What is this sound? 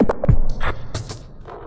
deconstruction-set dropline-slow
This is part of a sound set i've done in 2002 during a session testing Deconstructor from Tobybear, the basic version
was a simple drum-loop, sliced and processed with pitchshifting, panning, tremolo, delay, reverb, vocoder.. and all those cool onboard fx
Tweaking here and there the original sound was completely mangled..
i saved the work in 2 folders: 'deconstruction-set' contain the longer slices (meant to be used with a sampler), 'deconstruction-kit' collects the smallest slices (to be used in a drum machine)
alien, button, clack, click, cuts, cyborg, digital, effect, electro, fx, glitch, hi-tech, mutate, noise, processing, robot, slices, soundeffect, soundesign, switch, transform